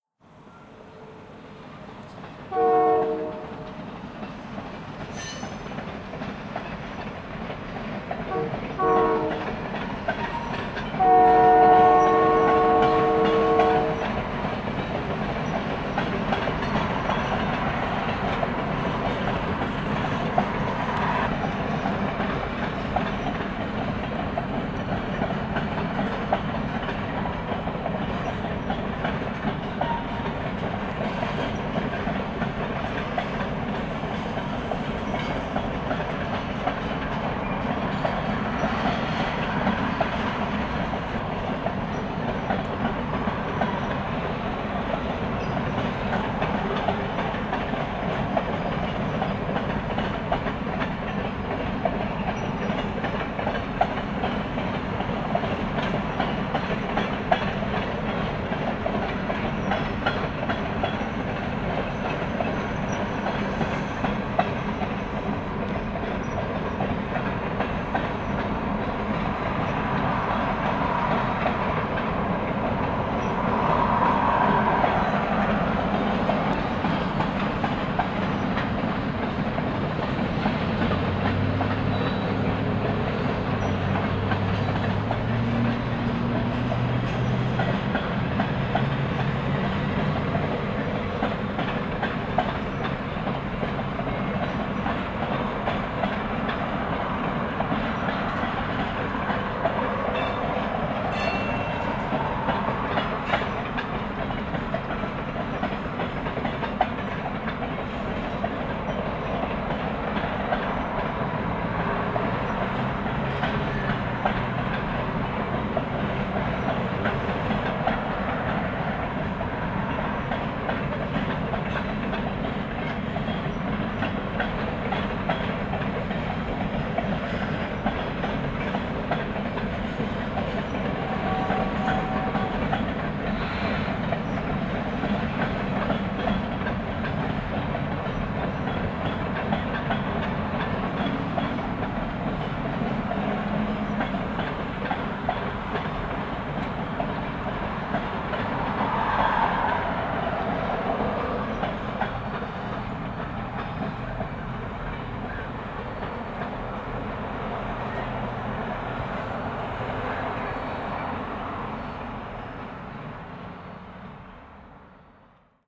FINALLY GOT THE HORN! Enjoy!
Sony Cybershot Camera
passenger-train, train, railway